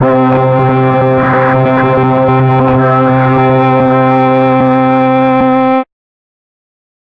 Broken Transmission Pads: C2 note, random gabbled modulated sounds using Absynth 5. Sampled into Ableton with a bit of effects, compression using PSP Compressor2 and PSP Warmer. Vocals sounds to try to make it sound like a garbled transmission or something alien. Crazy sounds is what I do.
atmosphere, industrial, electronic, synth, space, loop, artificial, granular, samples, horror, evolving, cinematic, experimental, pack, dark, drone, vocal, texture, soundscape, ambient, glitch, pads